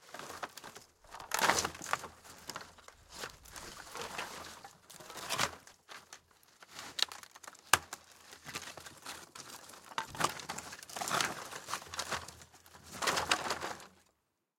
Wood panel board debris heap scramble dump various
Part of a series of sounds. I'm breaking up a rotten old piece of fencing in my back garden and thought I'd share the resulting sounds with the world!
Wood, scramble, board, panel, heap, debris, dump, various